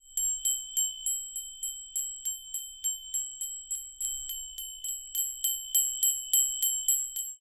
small bell 3
small bell, wchich is sometimes used like calling to meal
bell, christmas, CZ, Czech, Panska